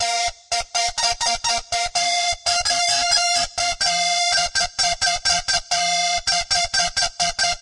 Live Dry Oddigy Guitar 14
bass, bitcrush, distorted, free, grit, guitars, live